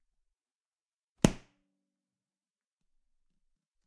Closing a book, 511 pages.
book, noise, slam